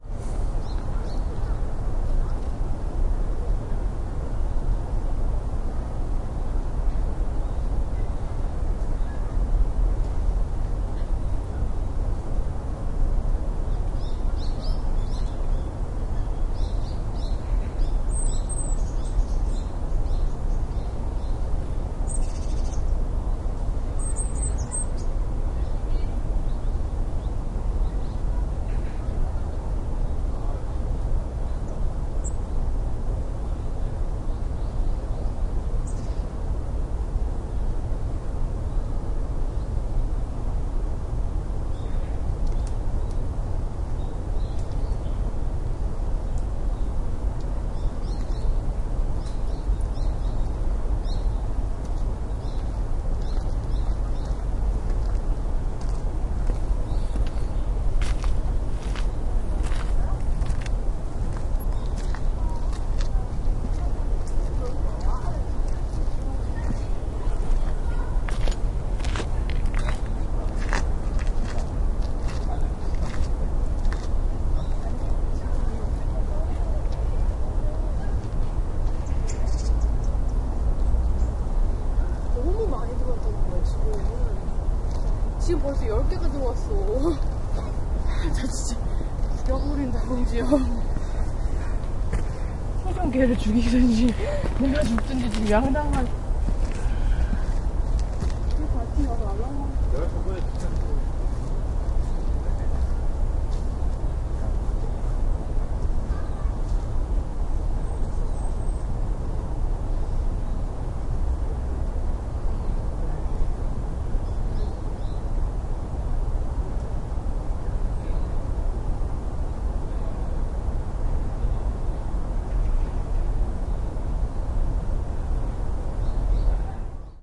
0063 Birds and conversation
Birds, people talking, footsteps
20120116
field-recording, seoul